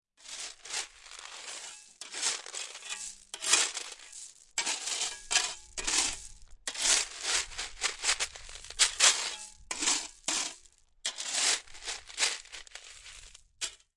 Sound of shovel
CZ, Czech